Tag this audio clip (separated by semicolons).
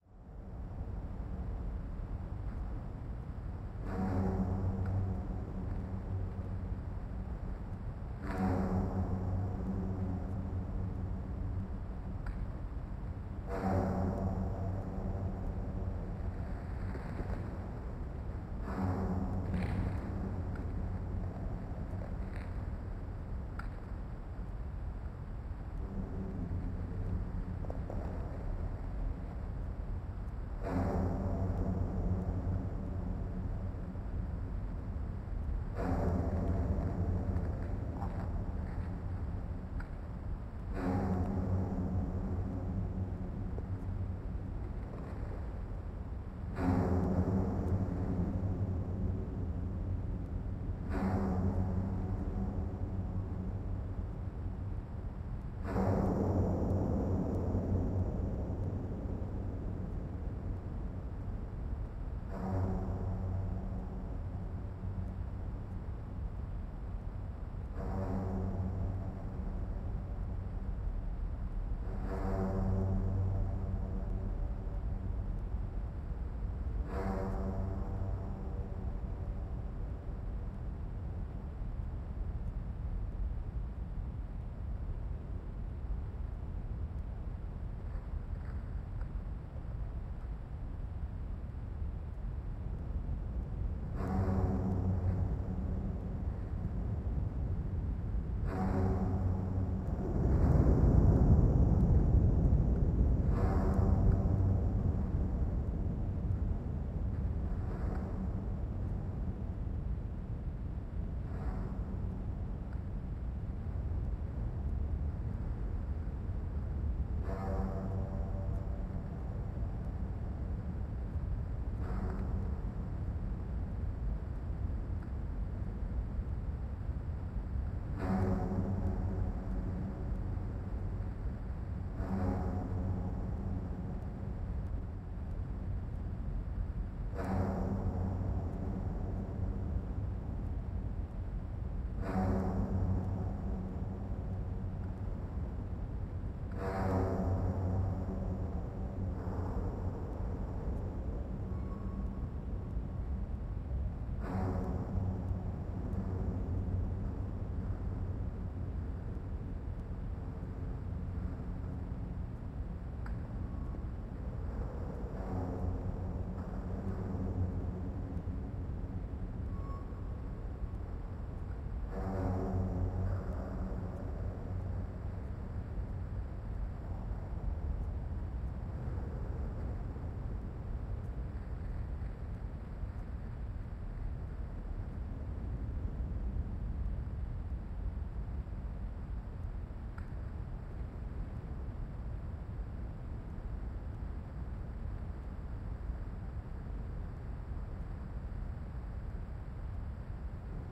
abandoned; animal; slaughter; slaughterhouse